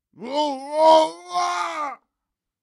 129 reus schrikt
Shout.
Recorded for some short movies.